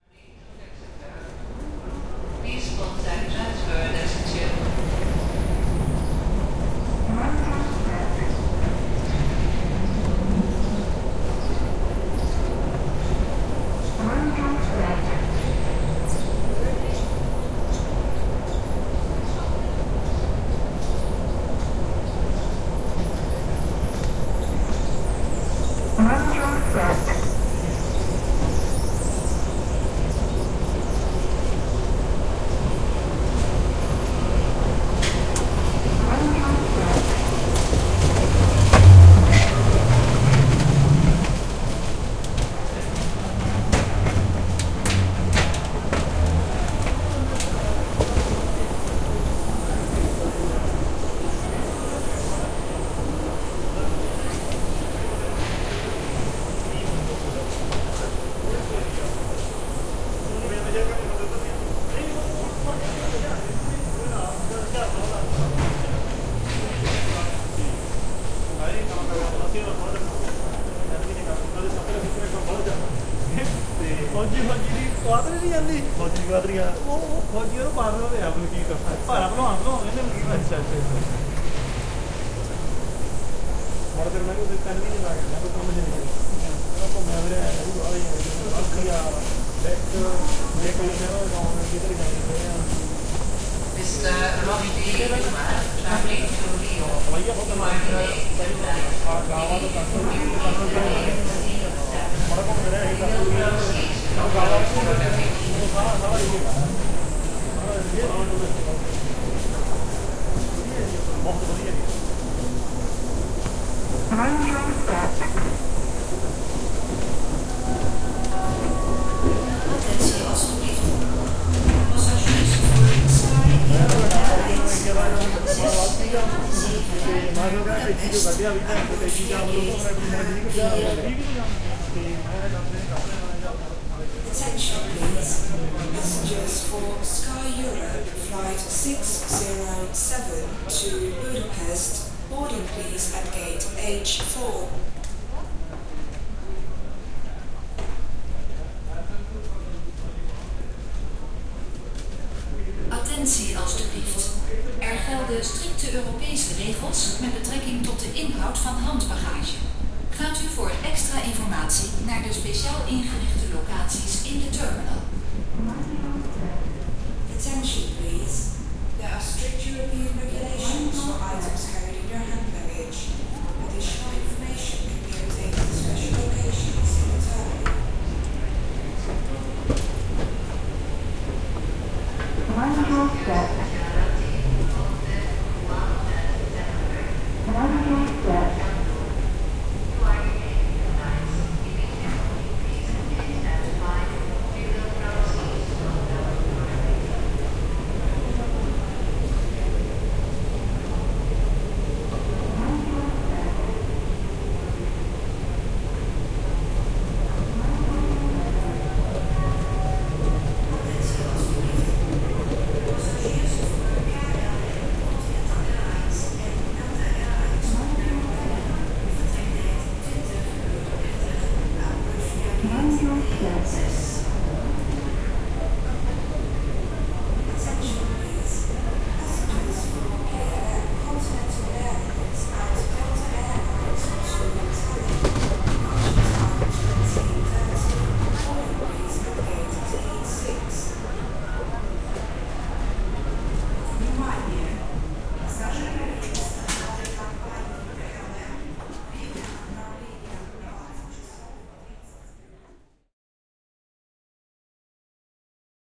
FS ATMO Schiphol
M/s Recording of Schiphol Airport interior after check-in.
people, speaker, atmo, plane, movement, noisy, stereo, Airport, schiphol, field-recording, ms, atmospere, crowd, holland, amsterdam, travel, transport